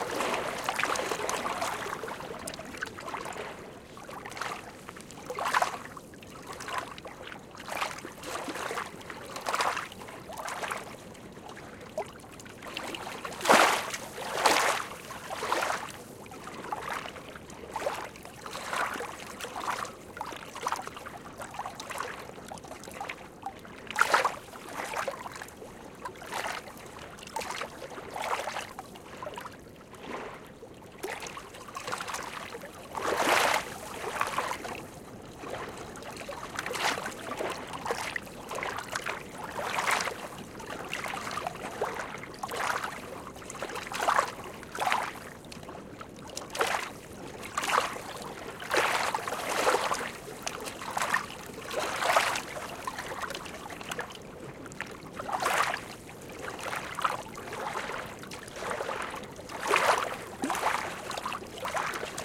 20090826.lake.waves.02
Small waves splash (less softly) in a front-glacier lake (with an impossible name, but see Geotag) in South East Iceland. Shure WL183, FEL preamp, Edirol R09 recorder
waves, water, nature, iceland, field-recording, lake